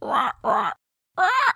weird bird5
making weird sounds while waiting for something to load
creature, bird